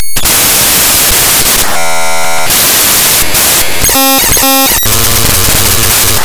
Glitch sound from RAW PDF
A raw PDF file loaded into audacity, giving a nice glitch sound
digital, electronic, glitch, noise, sound-design